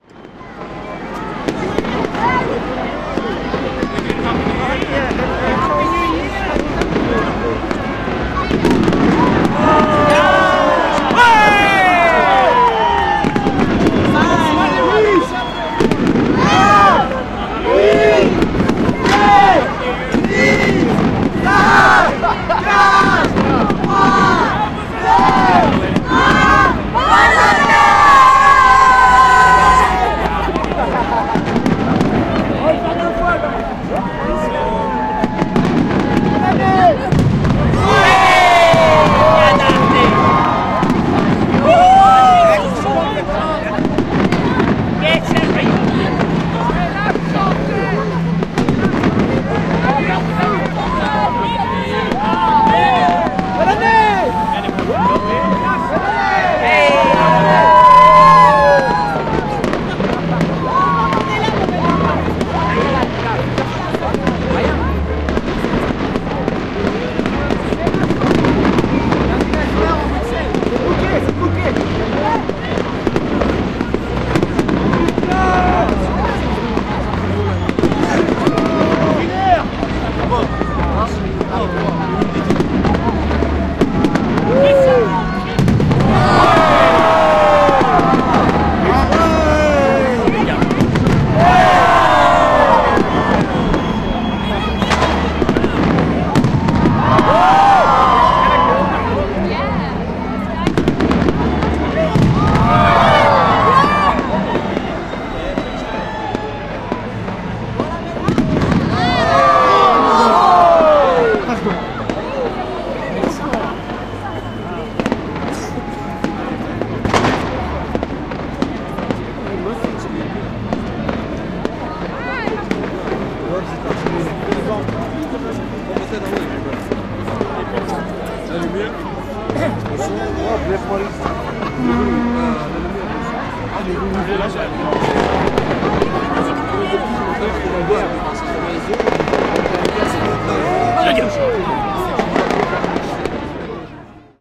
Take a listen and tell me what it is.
NewYear2011Brussels
Thousands of people gather to see the city of Brussels fireworks on new year's eve. The recording is a little compressed and I couldn't avoid clipping now an then, but on the other hand the atmos is very lively.
brussels, cheering, countdown, fireworks, french, happy, new, people, shouting, square, year